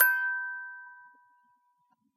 clean sol ci
eliasheunincks musicbox-samplepack, i just cleaned it. sounds less organic now.
metal, toy, musicbox